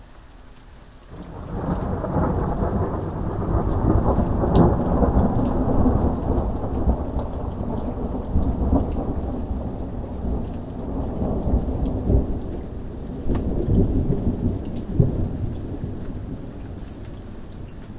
Booming Thunder in Distance
Captured during a rainstorm at night. Recorded with Tascam DR 05. Gain increased by about 10 dB. No other effects added.
boom, thunderstorm, rainstorm, nature, raining, rumble, storm, clap, loud, field-recording, weather, lightning, clear, thunder, thunder-storm, booming, rain